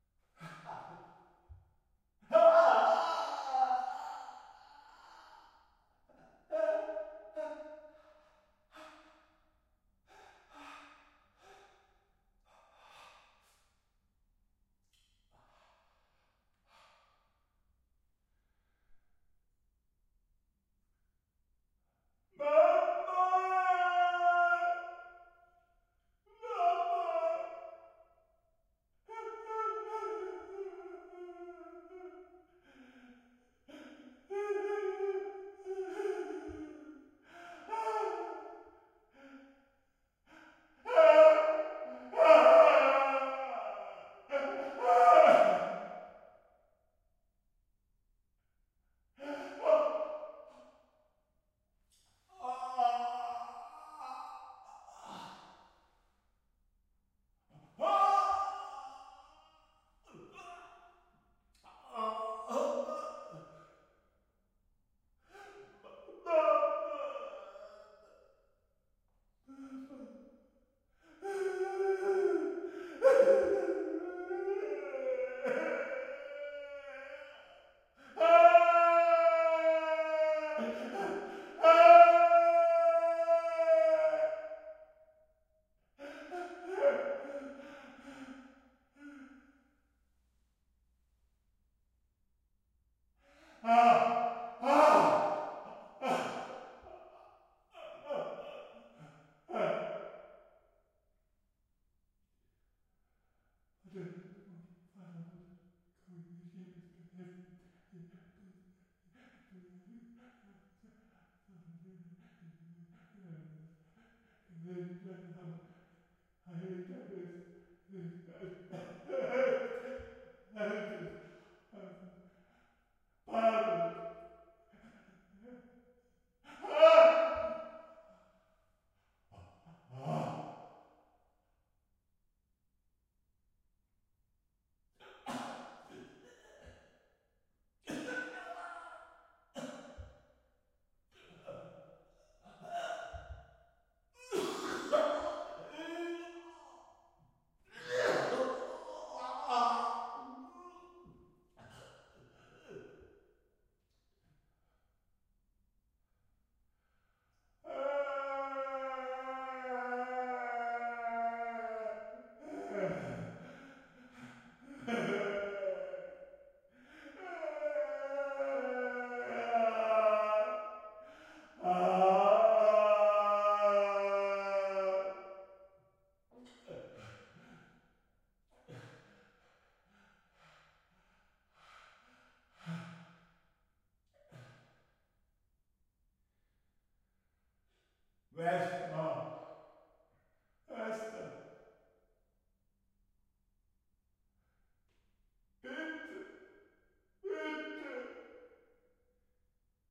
Man Pain Similar BassBoost
Needed different sounds of men crying in pain. So recorded a set of different noises, grunts and crys. Made some fast mixes - but you can take all the originals and do your own creative combination. But for the stressed and lazy ones - you can use the fast mixes :-) I just cleaned them up. Si hopefully you find the right little drama of pain for your project here.
pain, whimpering, ache, hospital, madhouse, war, cry, ambulance, men